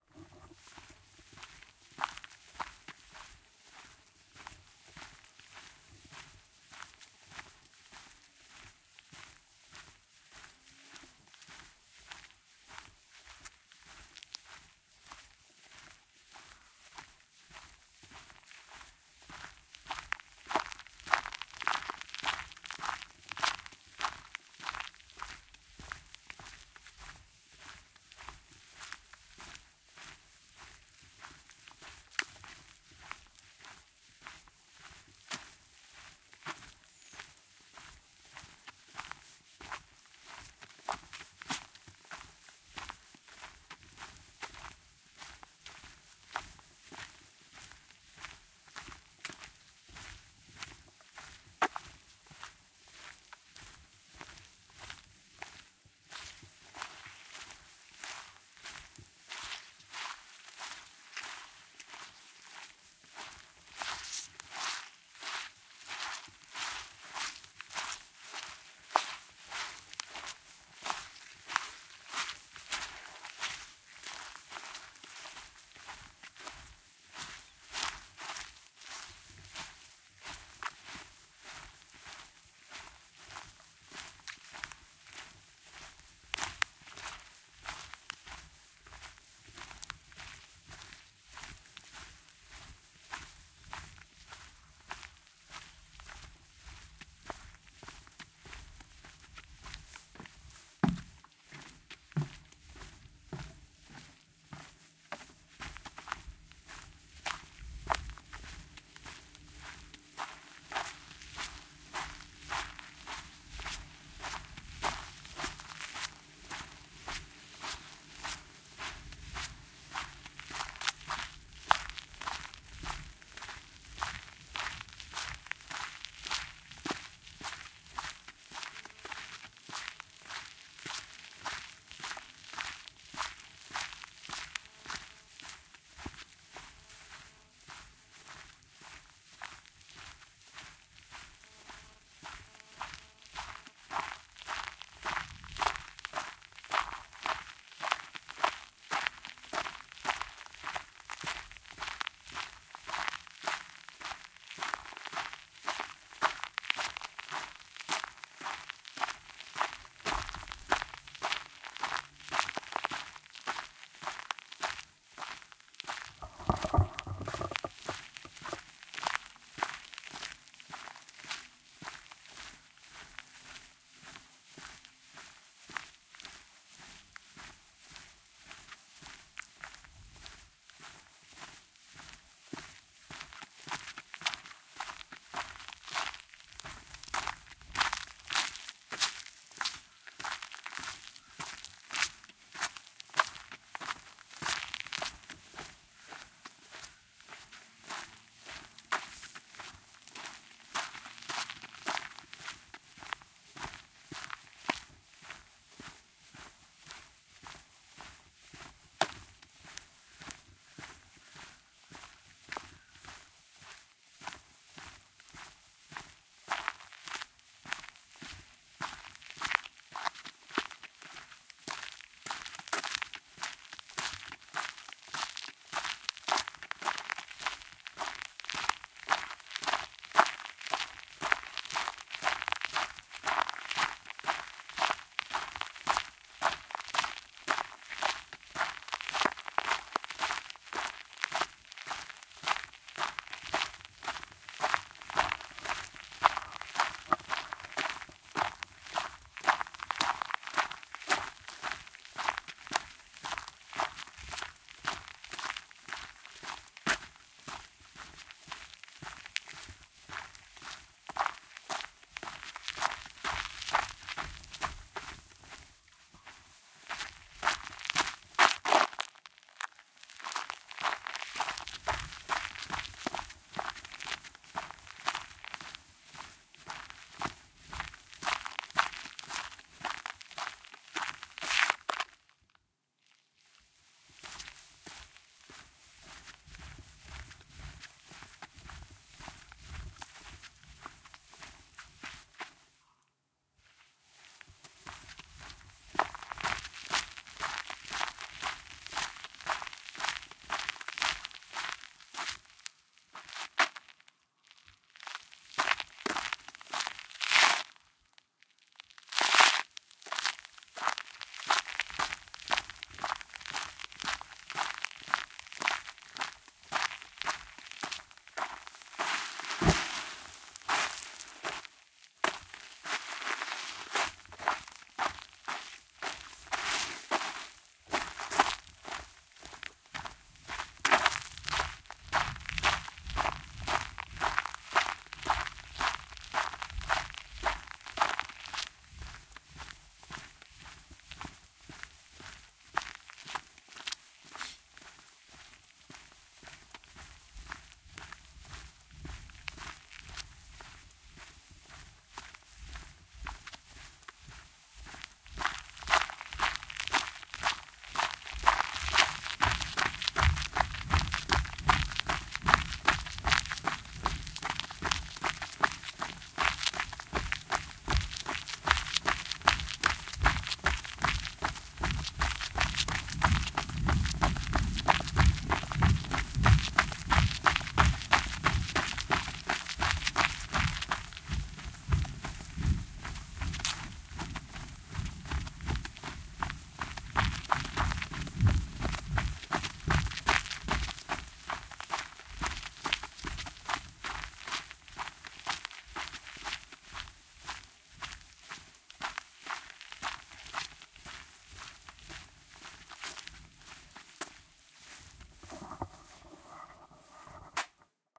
Walk on gravel, grass, wet grass and more. Short runs and start/stops as well.